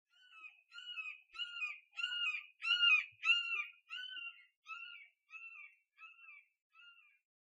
This is a heavily processed hawk call I recorded in my grandparent's yard. I used my Walkman Mp3 Player/Recorder.